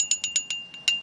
Sound of followed thuds on the glass, recorded with a very simple microphone and edited to be cleaner.